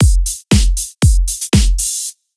Modern Funk loop 1
Made this very easy modernfunk loop and i think it has a nice groove. I used the included samples from FL Studio where i also put togheter the loop and mixing preference.